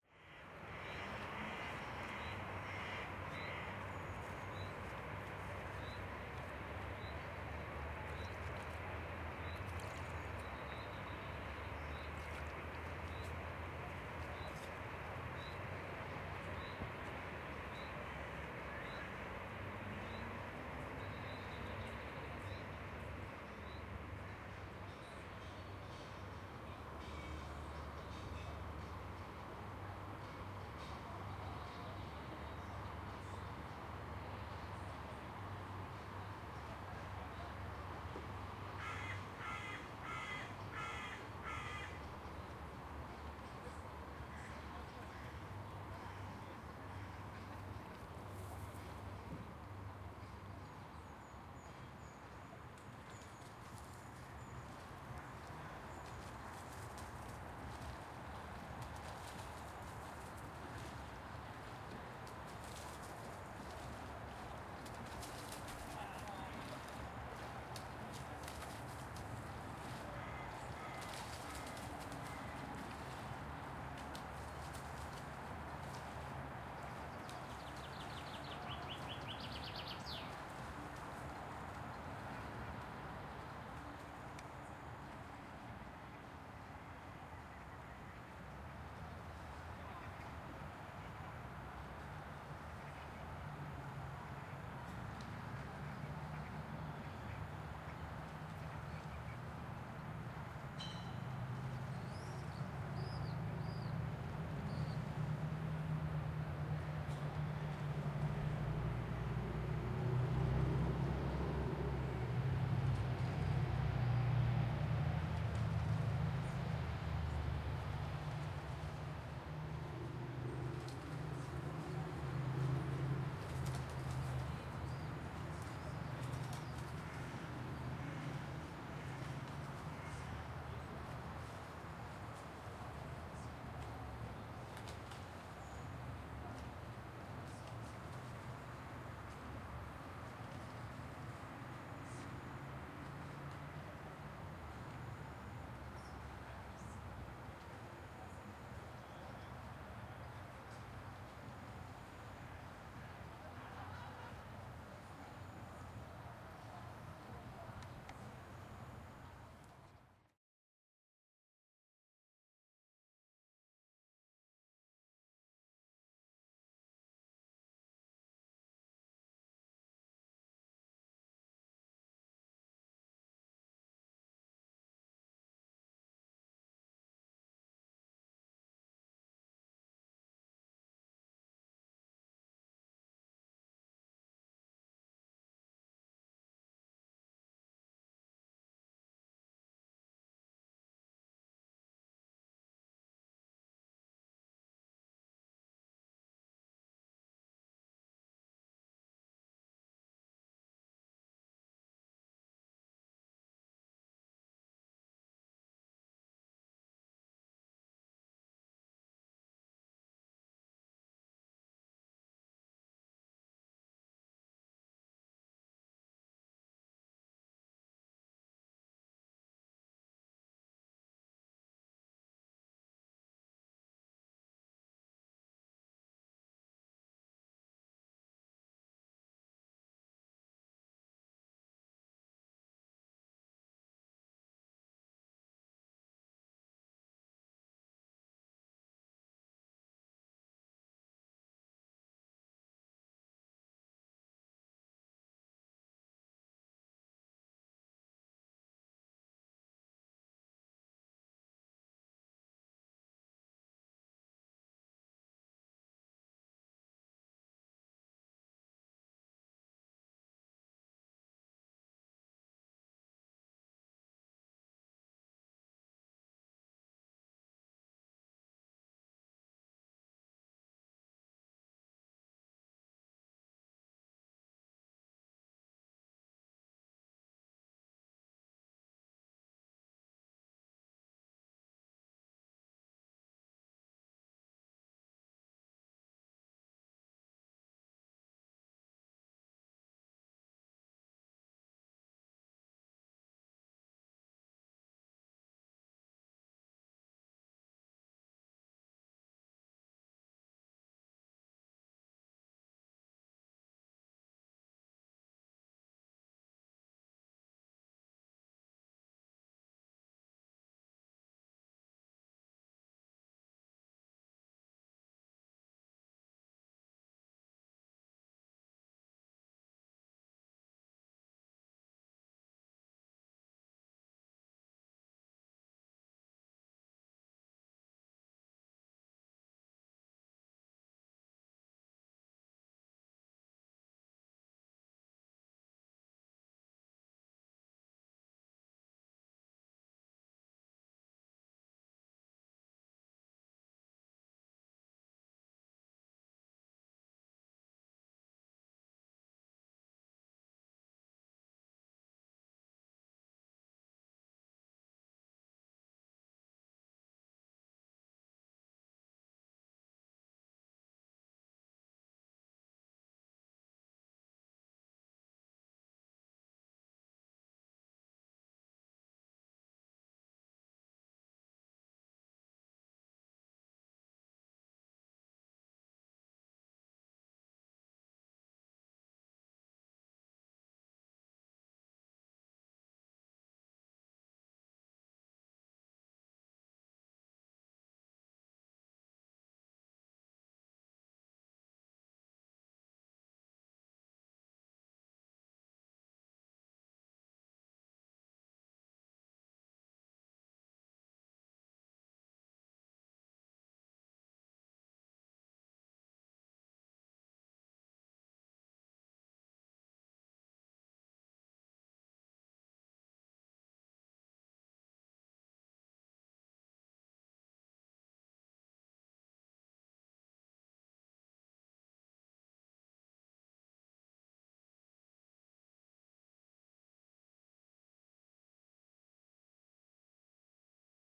Torino, Riva del Po 2
26 Giugno ore 21 Canoe, oche sulla riva, ristorante e musica in sottofondo, grilli
Tascam DR-40, stereo capsule convergenti.
po, torino